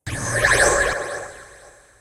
HITS & DRONES 15
Fx, Sound, broadcasting